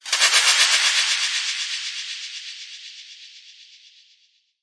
CC noise flutter
Noisey reverberant echoey thing